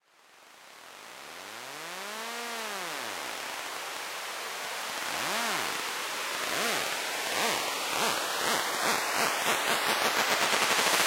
This is a so called "whoosh-effect" which is often used in electronic music. Originally it´s a 6-bars sample at 130
It´s a sample from my sample pack "whoosh sfx", most of these samples are made with synthesizers, others are sounds i recorded.